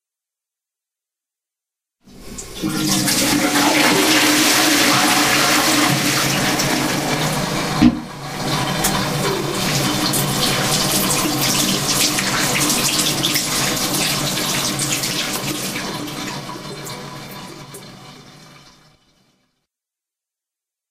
Water in toilet, wash hands
toilet, hands, wash, water